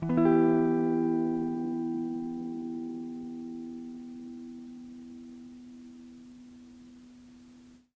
Tape El Guitar 16
Lo-fi tape samples at your disposal.